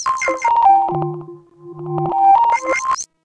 A small notification sound I made for use with my cell phone. Recorded on Audacity using a Korg Electribe.
phone, simple